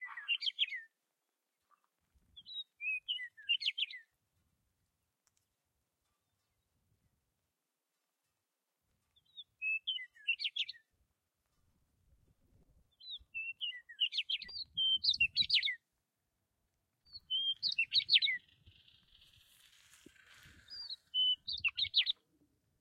A very clean recording of a sweet sounding chirping bird. Recorded in St. Augustine, Florida